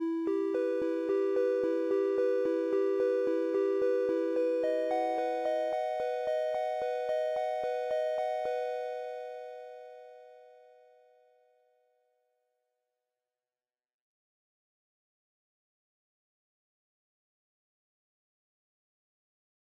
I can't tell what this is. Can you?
010 Scene 1 - SYNTHS - ARPEGGIO
sad type synth loop that works with "MISTICO BEAT" folder. 110BPM
melancholic, loop, Sad, electronic, synth, bells, arpeggio